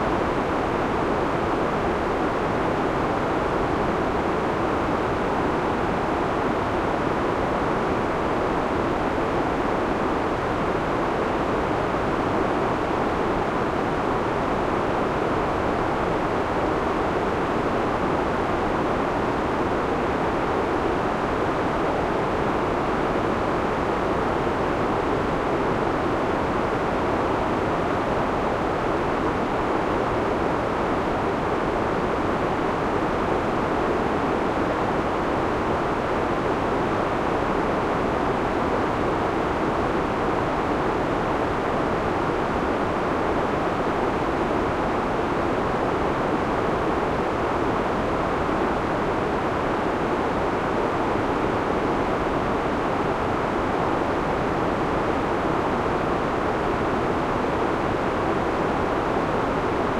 Mountain Wind – Silence, Ambiance, Air, Tone, Buzz, Noise
This is a series of sounds created using brown or Brownian noise to generate 'silence' that can be put into the background of videos (or other media). The names are just descriptive to differentiate them and don’t include any added sounds. If the sound of one is close, then try others in the pack.